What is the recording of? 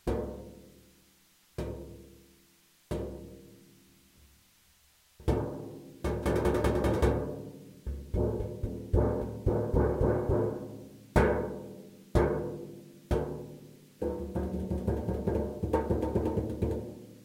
Tapping metal cake tin
Tapping a metal cake tin with finger